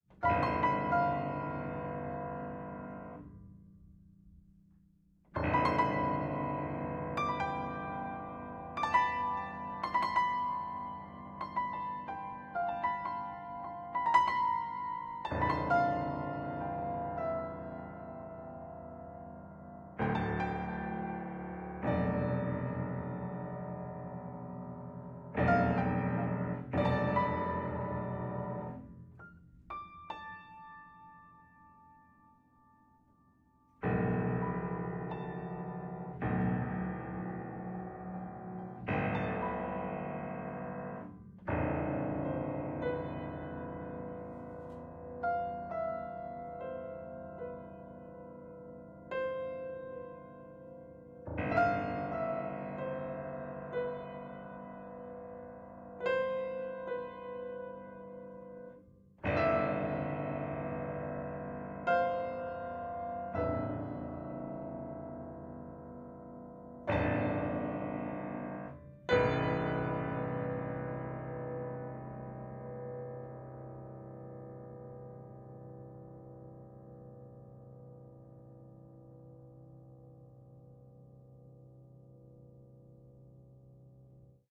Upright Piano Dark Random 2
Playing around trying to make dark atmospheres with an upright piano. Recorded with RODE NT4 XY-stereo microphone going into MOTU Ultralite MK3.
upright, piano, Rode-NT4, dark, experimental, small-room, xy-stereo, close-mic, improvisation